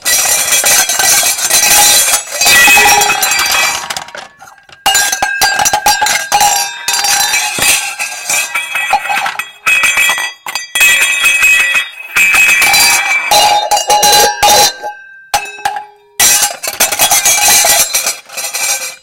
I'm shaking kitchen utilery, metal stuff. Recorded with Edirol R-1 & Sennheiser ME66.